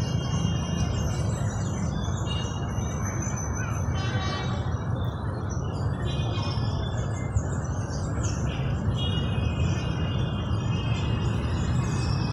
pitos1 palma SIBGA
Sonido de pitos de carros, registrado en el Parque San Pio, Cra. 33 #45, Bucaramanga, Santander. Registro realizado como ejercicio dentro del proyecto SIAS de la Universidad Antonio Nariño.
car horn